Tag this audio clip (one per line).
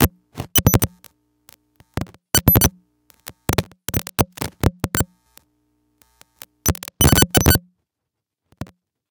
beep
computer
glitch
bleep
pop
interference
electronic
digital
click
noise
blip